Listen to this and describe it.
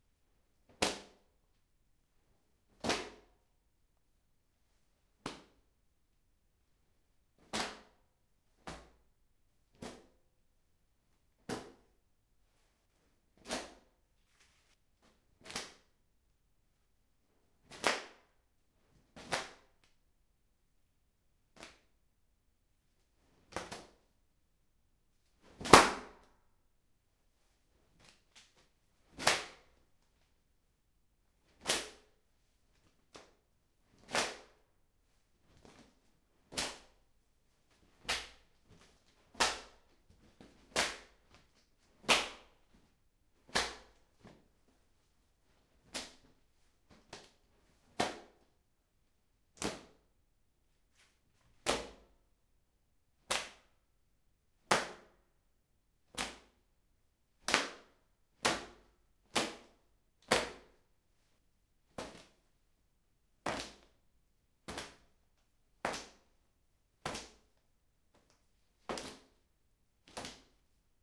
wet towel on body-source
Wet towel applied to human body back.
You can download single sounds cut, cleaned and normalized at the link in the comments.
bathroom, body, bsd, club, free, hit, hitting, mit, object, pound, punch, punching, skin, thing, towel, wet, zlib, zworks